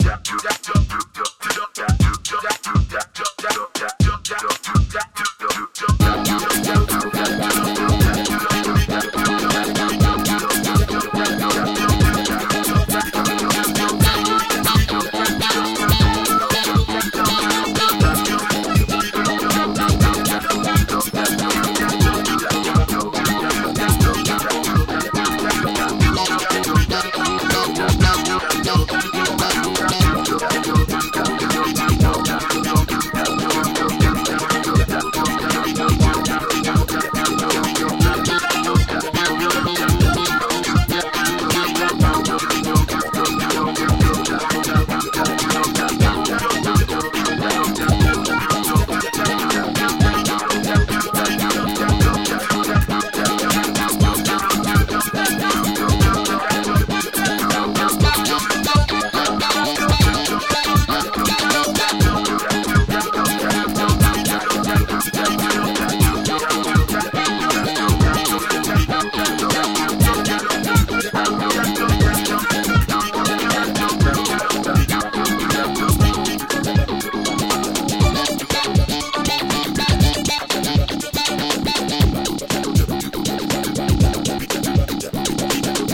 Retro Party Dance 80s 70s Funk Talkbox Synth Organ Drum Music Cinematic
120BPM
Cinematic, Funk